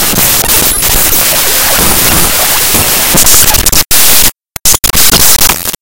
Glitch - FF6
These Are Some Raw Data. Everyone Knows that Trick, Here's My take on it, Emulators (your Favorite old school RPG's), Open LSDJ in Audacity, Fun Fun.